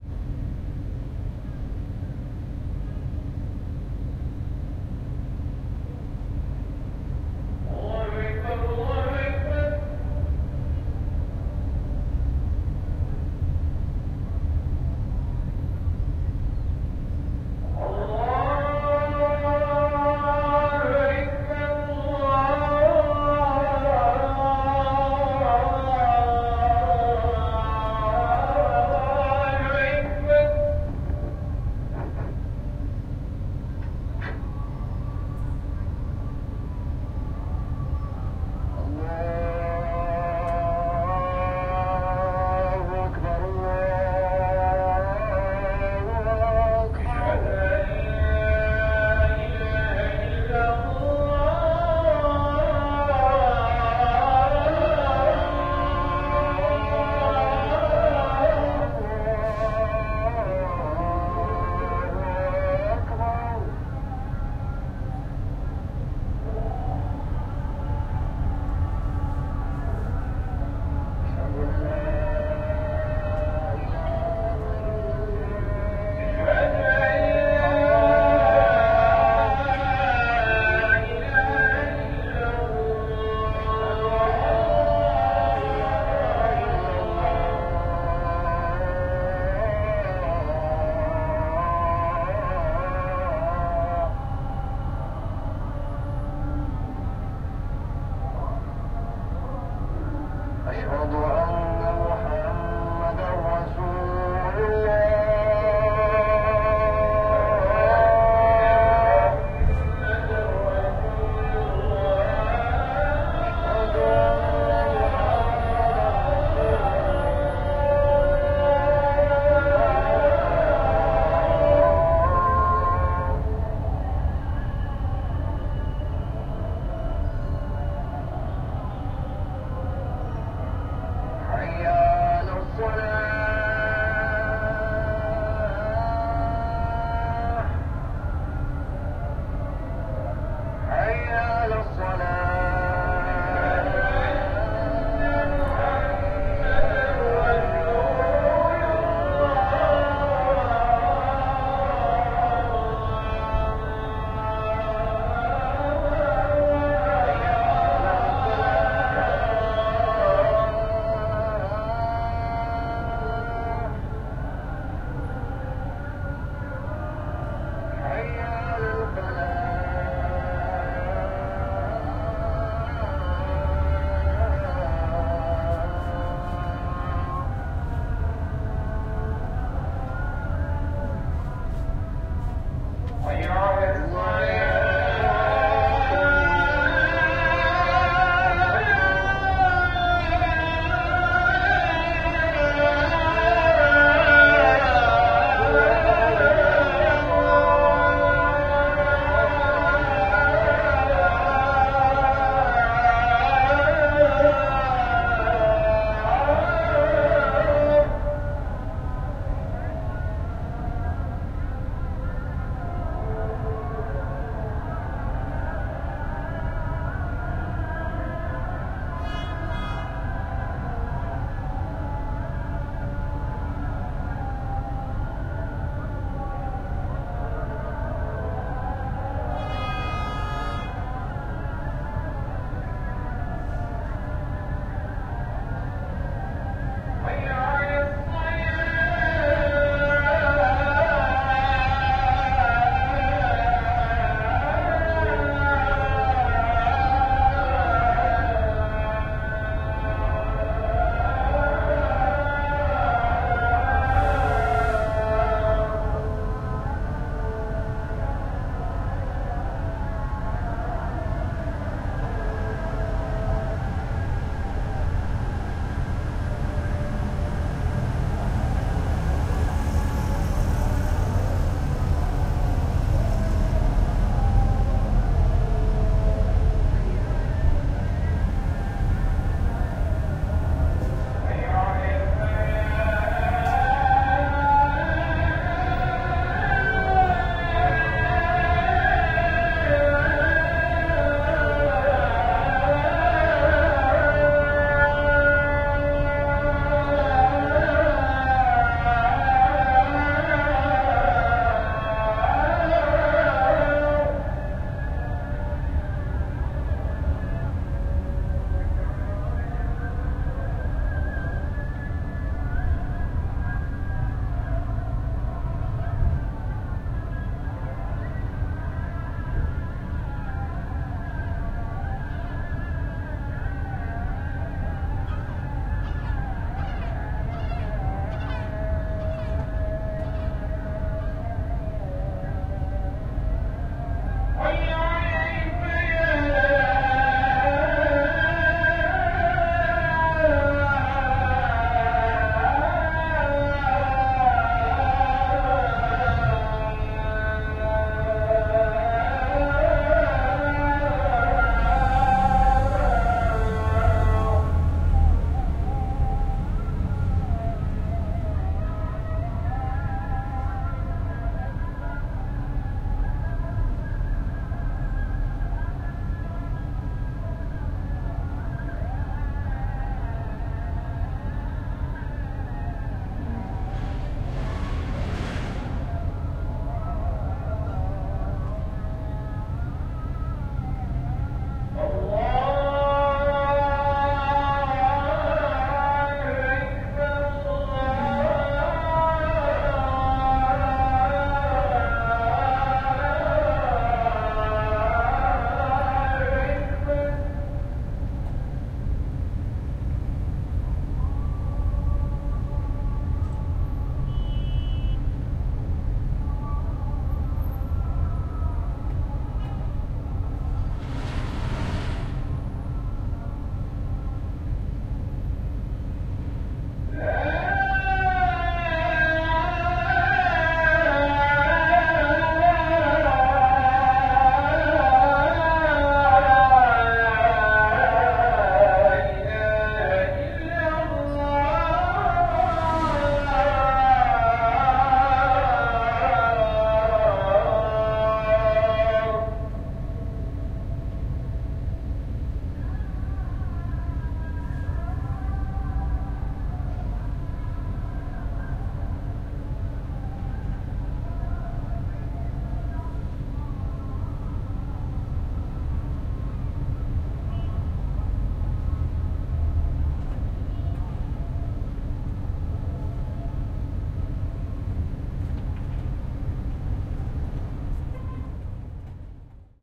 istanbul adhan
On the roof of a hotel in the Sultanahmet section of Istanbul, less than a kilometer from the Blue Mosque, the sound of the evening prayers echo from tinny loudspeakers throughout the city. The Blue Mosque is the loudest of the adhans, but you can hear others in the distance as well. Recorded on 26 June 2012 at 10.40pm in Istanbul, Turkey using a Zoom H4. High-pass filter.
Istanbul, mosque, city, ambience, adhan, azan, call, prayer, environment, Blue, muezzin, Muslim, Islam, Turkey